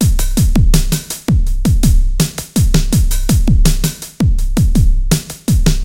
82bpm 2 bar industrial drum loop